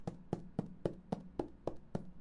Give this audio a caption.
Steps on rock
domain, public